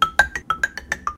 Quick Jam 5
Sound from xylophone qj5